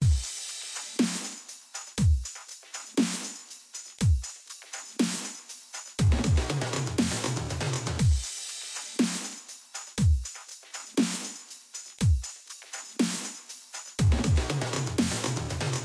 EARTH A DRUMS
This is a quick Drum sample, I will upload some more later today.
sound, Dance, sample, soundeffect, Bright, Repeating, processing, pattern, edm, Random, Alien, Techy, effect, electric, Pulsating, Oscillation, sound-effect